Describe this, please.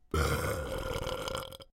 A creepy sounding breathy burp.
A studio recording of my friend Cory Cone, the best burper I know. Recorded into Ardour using a Rode NT1 and a Presonus Firepod.
belch, burp